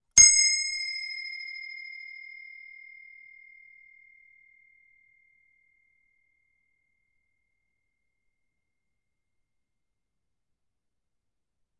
brass bell 01 take1
This is the recording of a small brass bell.
bell, brass, ding